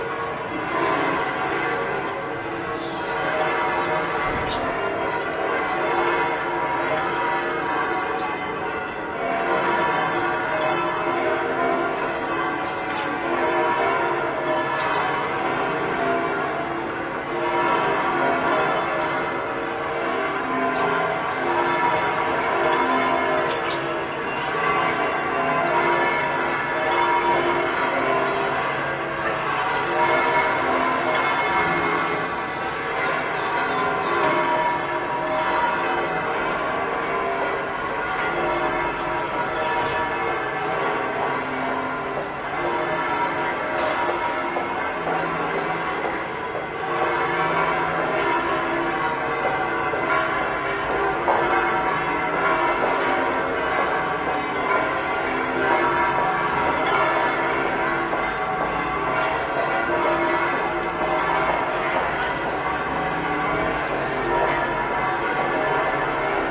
20070401.bells.tweet
highly pitched bird tweets (Canary, Swift, and an unknown bird that chirps at 7 kHz) on a background of distant pealing church bells, street noise and heel taping
ambiance, streetnoise, city, field-recording, bells, spring, south-spain